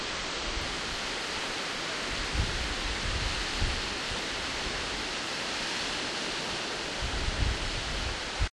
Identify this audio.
newjersey OC musicpier rear
Snippet from the ocean side of the music pier on the boardwalk in Ocean City recorded with DS-40 and edited and Wavoaur.
ambiance, boardwalk, field-recording, music-pier, ocean-city